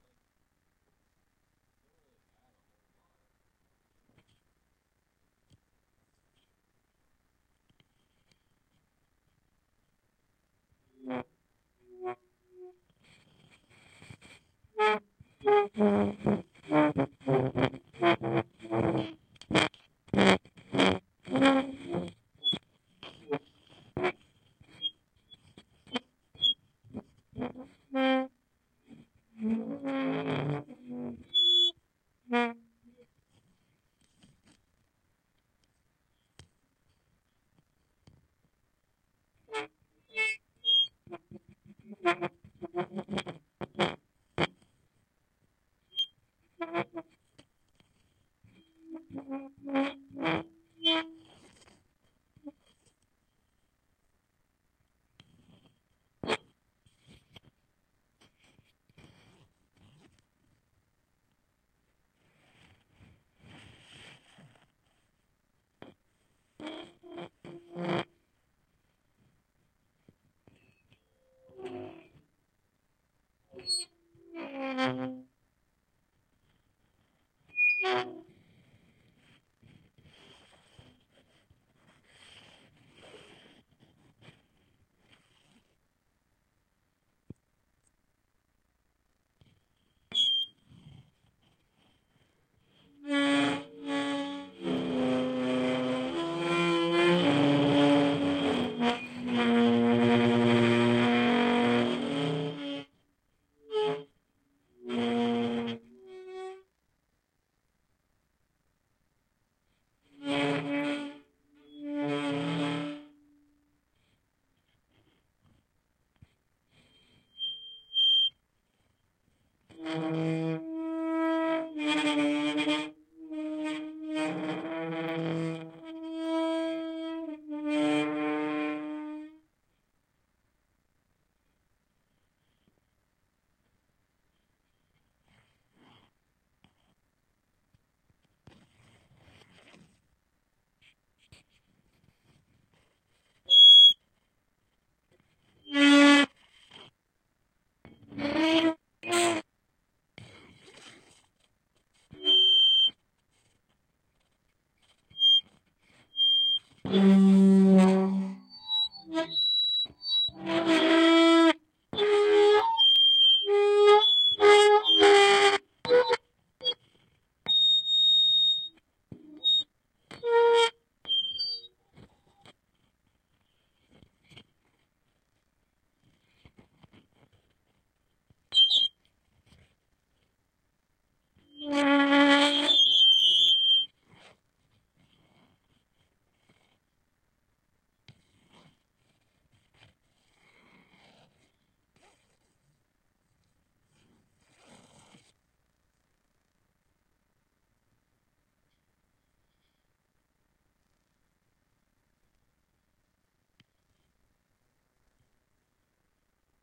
A feedback loop involving a contact mic and surface transducer!